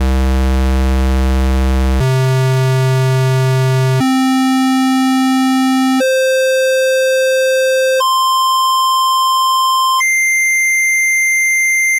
Pitch Reference Square for Morphagene
6 octaves of a simple square wave in concert C for reference. Tuning oscillators has never been easier! Just patch an oscillator output into the Morphagene input, select an octave with the Organize control and dial Sound on Sound to mix the two to assist in tuning.
mgreel, morphagene, pitch, reference, utility